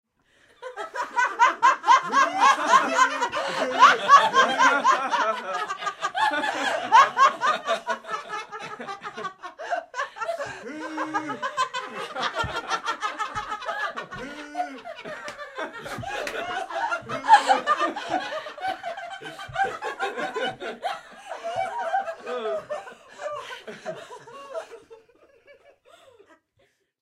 group laugh long exaggerate
group of people laughing exaggerated
Recorded with 2 different microphones (sm 58 and behringer B1) via an MBox giving a typical stereo feel.
fun, vocal, funny, humor, laughter, laughing, comic, folio, comedy, group